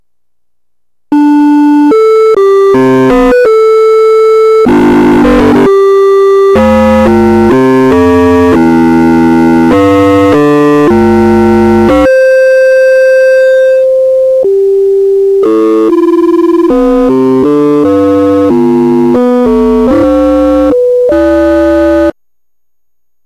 Sine Wave Shit
Hey, Haggled a pawn shop owner into selling me an Alesis Micron for 125$ hehe, I have some sex appeal baby. *blush*
These some IDM samples I pulled off of it by playing with the synth setting, They have went through no mastering and are rather large files, So or that I am sorry, Thanks!
ambient, canada, soundscape, sfx